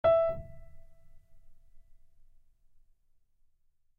acoustic piano tone
wood, acoustic, realistic, piano